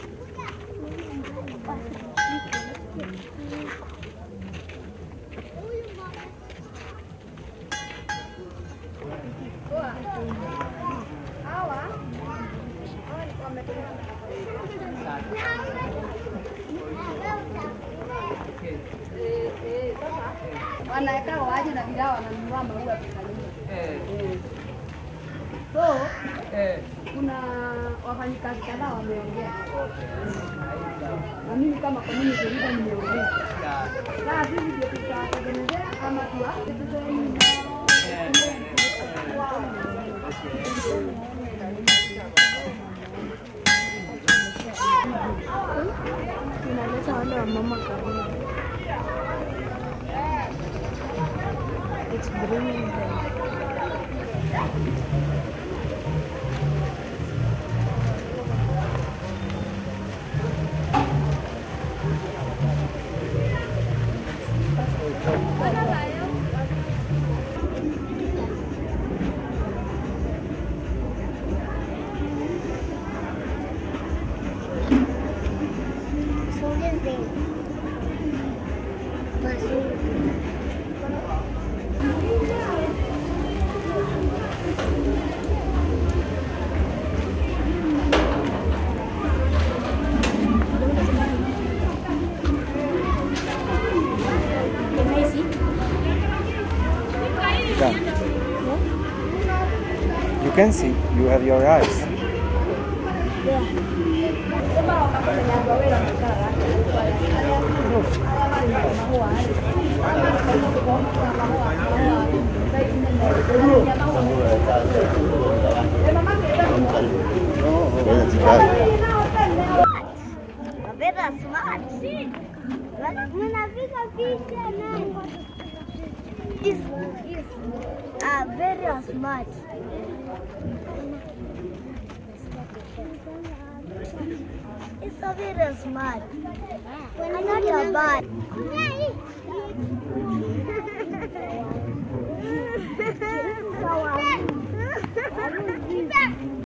dec2016 Naiwasha Lake Slum ambient Kenya
Naiwasha Lake Slum ambient
Lake, Naiwasha